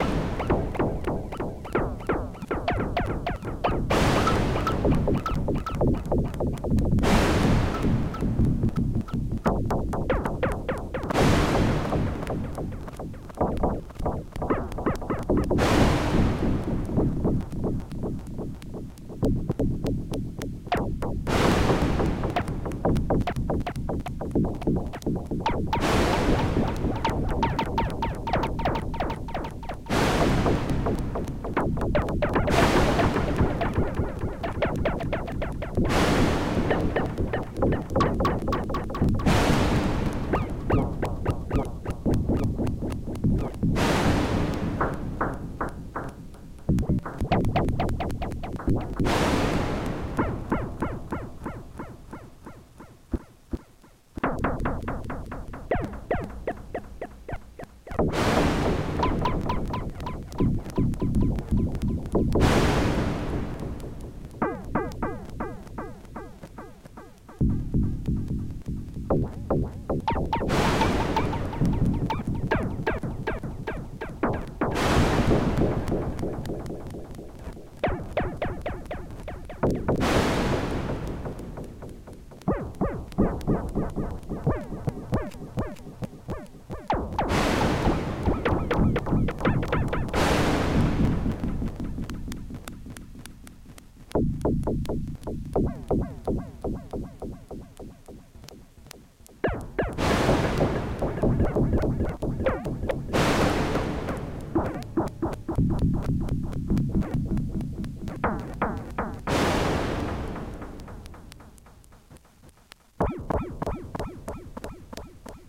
Noise & FM Hit
Modular Recording Of a Doepfer 9U Modular Synth
Noise -> Vactrol LoPass Gate
FM -> Vactrol Lopas Gate
Sequenced and controled by semi Random sequencer
Spring Reverb
Bell, Burst, doepfer, FM, modular, Noise, noodle, spring-reverb, Synth, Synthetic, Synthetizer, west-coast-synthesis